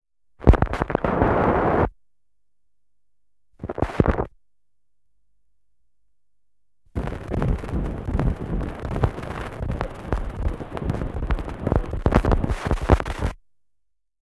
Vanha levysoitin, neula rahisee vinyylilevyllä / Old record player, needle rasping on vinyl. 3 x.
Neula lasketaan äänilevylle, rasahdus, neula liukuu, lyhyt rahina. Neula jumiutuu, levy pyörii paikallaan. Lähiääni. 3 x.
Äänitetty / Rec: Analoginen nauha / Analog tape
Paikka/Place: Yle / Finland / Tehostearkisto / Soundfx archive
Aika/Date: 1980-luku / 1980s